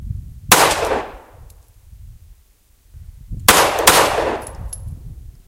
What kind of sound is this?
great sound of a 9mm pistol firing.